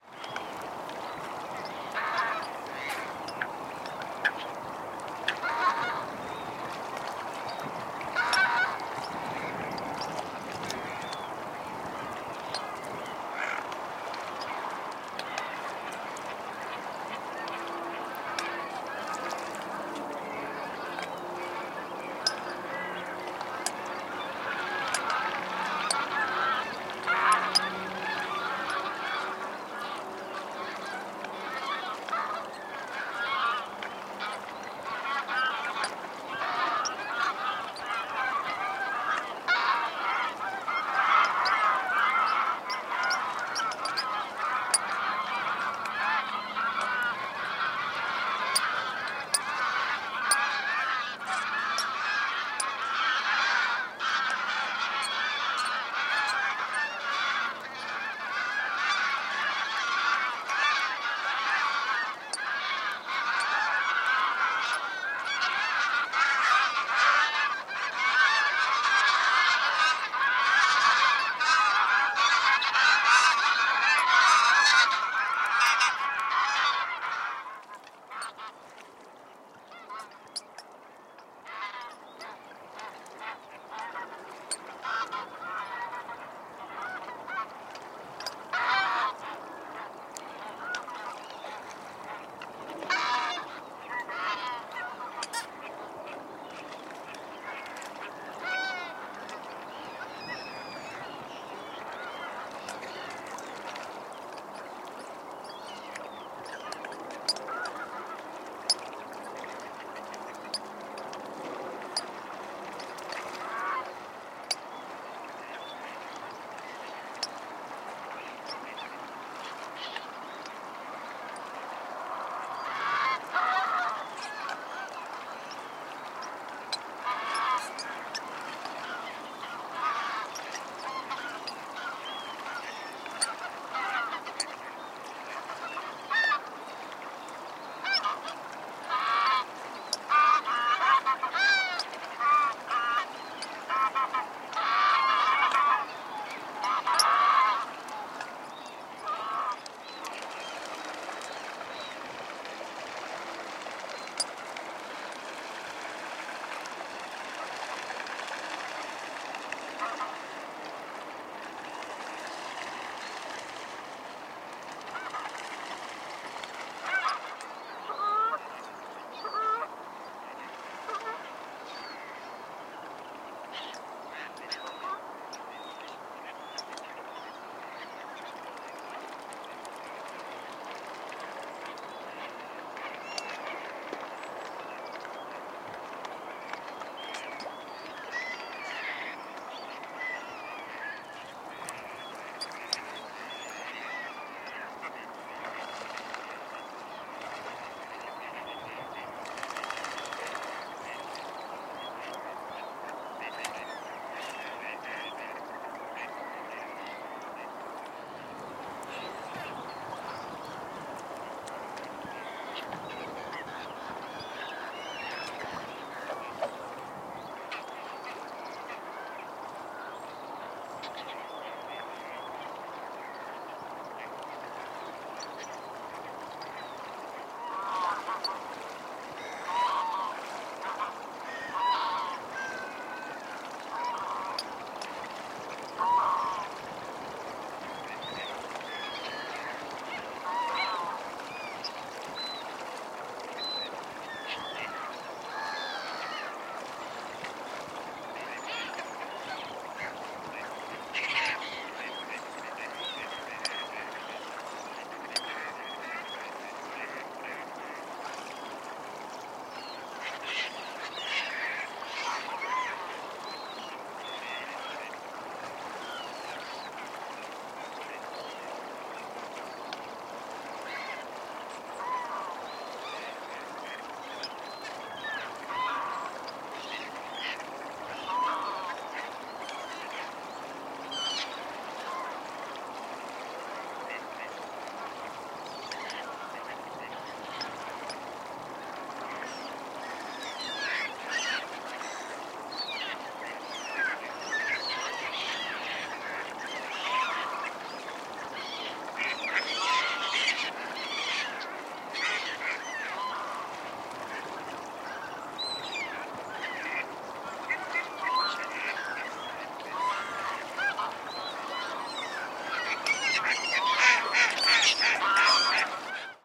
September Hanningfield Soundscape
Recorded at Hanningfield Reservoir, Essex, UK on the morning of 7/09/2019. Birds that can be heard include coot, black-headed gulls, greylag geese, Canada geese, mallard and mute swans (flapping their wings). There was an occasional breeze.
Recorded with a Sennheiser ME66/K6 attached to a Zoom H5. Edited to reduce the worst of the noises of human origin, including the use of a high pass filter. There are still some noises in the background on occasion.